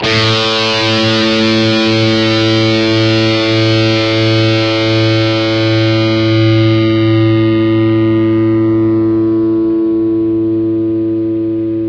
Long a note - Distorted guitar sound from ESP EC-300 and Boss GT-8 effects processor.